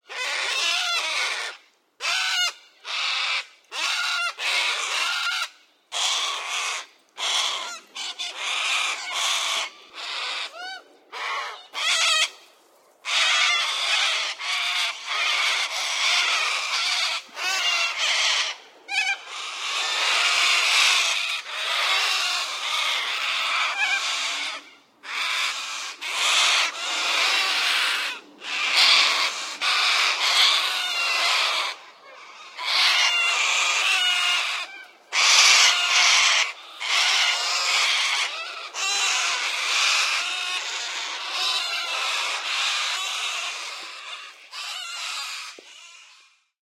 Corellas screeching
Australian Corella birds screeching away.
Australia, Australian, birdcall